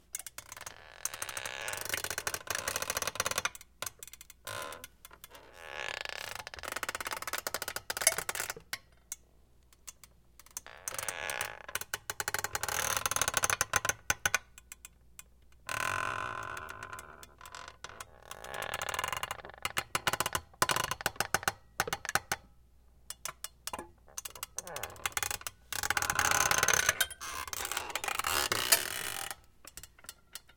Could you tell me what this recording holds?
Squeaky Chair 01B
Close-mic of a super squeaky office chair. This version is slow creaks with more spring noises.
Earthworks TC25 > Marantz PMD661
squeak, groan, popping, pops, creak, snap